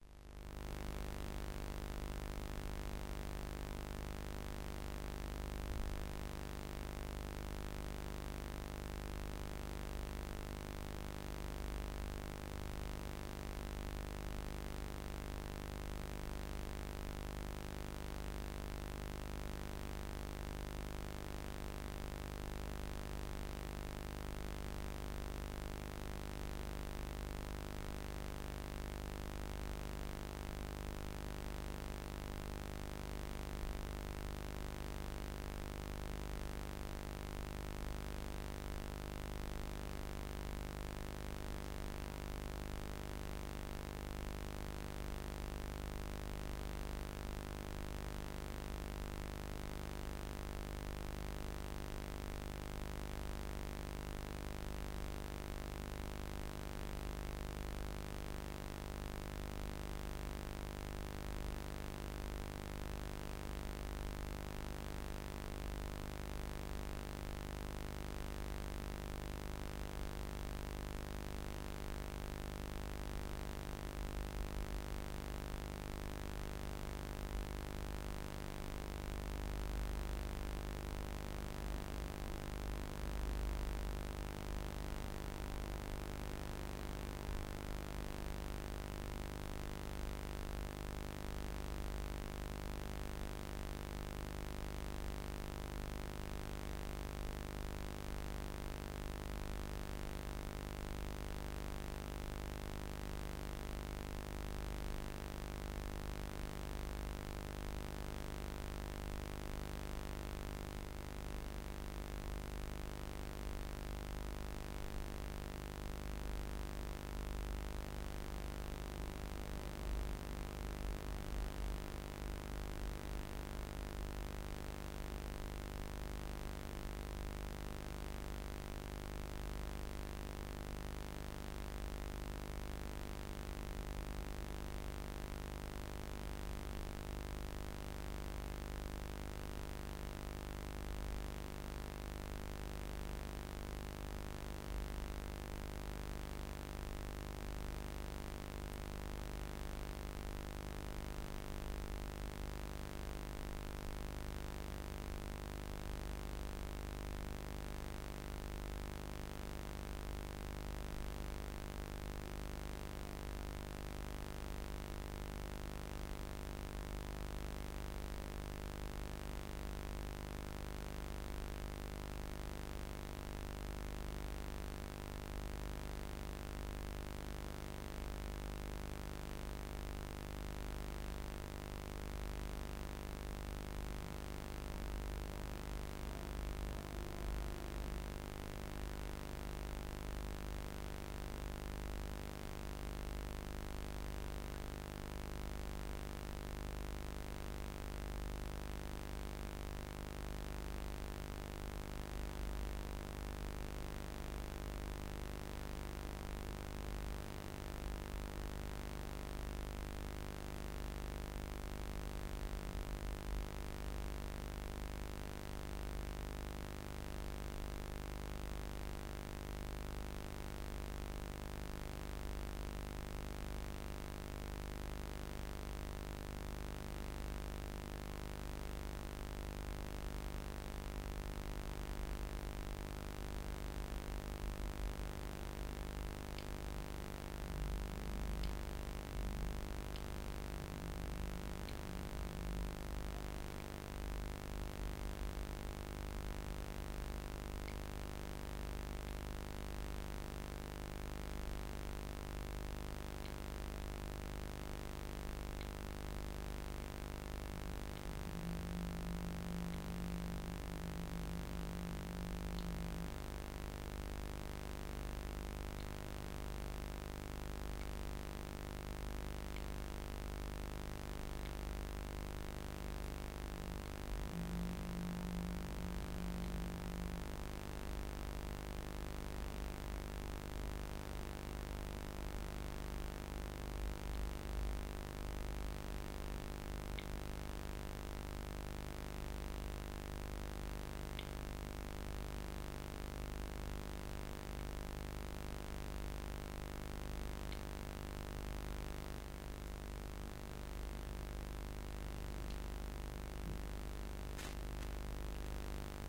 ECU-(A-XX)201 phase1
ATV, Beam, Broadband, Carb, Channel, COx, ECU, Fraser, Iso, Jitter, Lens, Link, MCV, Optical, PCM, Reluctor, SOx, Synchronous, T2, UTV, Wideband